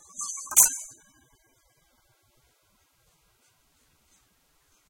Sliding and hit oven grate.